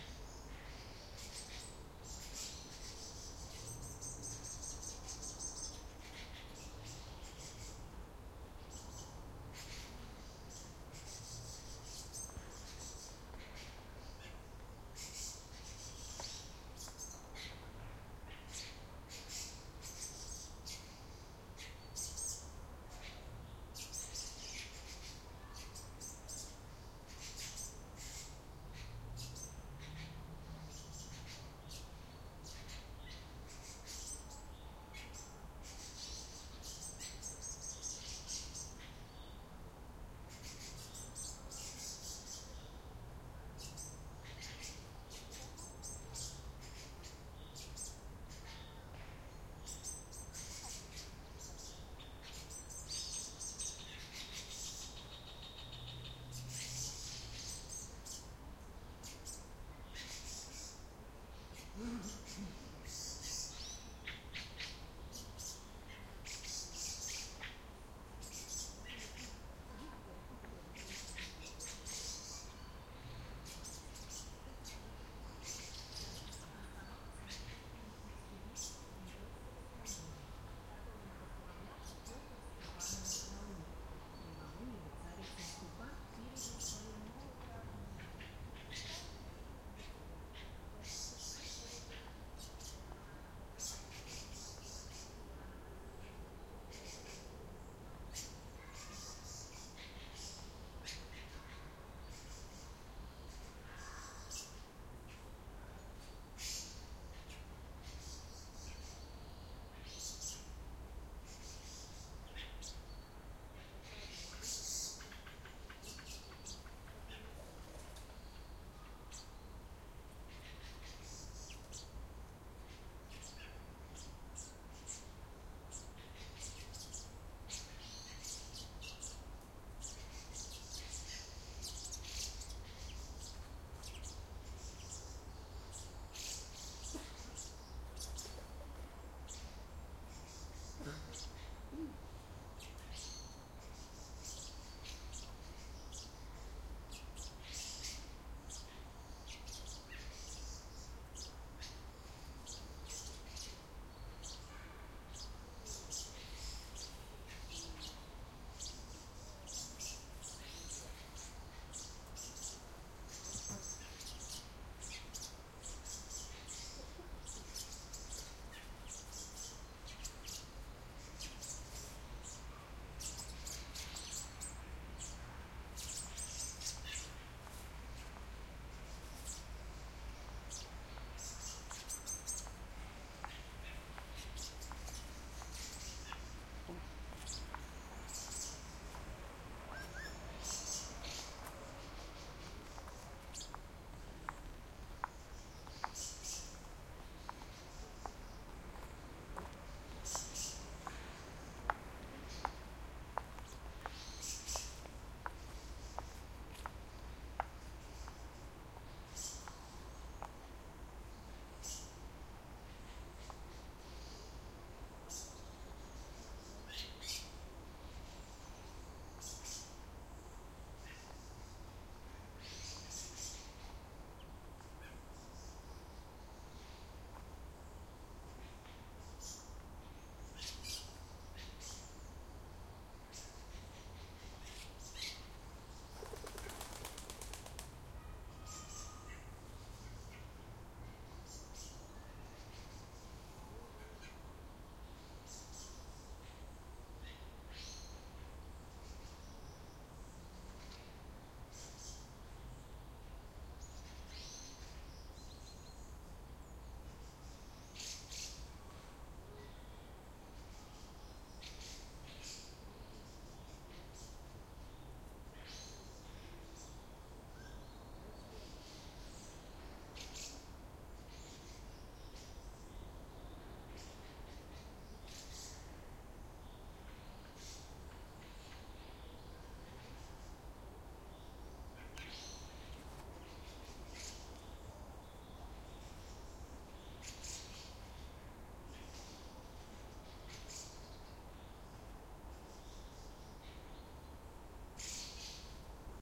Summer park ambience, with a lot of birds, some people talking & walking around, wing flaps etc.
Made with Roland R-26 XY mics.